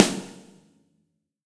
BRZ SNARE 001 - WOH
This sample pack contains real snare drum samples, each of which has two versions. The NOH ("No Overheads") mono version is just the close mics with processing and sometimes plugins. The WOH ("With Overheads") versions add the overhead mics of the kit to this.
These samples were recorded in the studio by five different drummers using several different snare drums in three different tracking rooms. The close mics are mostly a combination of Josephson e22S and Shure SM57 although Sennheiser MD421s, Beyer Dynamic M201s and Audio Technica ATM-250s were also used. Preamps were mainly NPNG and API although Neve, Amek and Millennia Media were also used. Compression was mostly Symetrix 501 and ART Levelar although Drawmer and Focusrite were also used. The overhead mics were mostly Lawson FET47s although Neumann TLM103s, AKG C414s and a C426B were also used.
close, drum, live, overheads, real, room, snare, space, stereo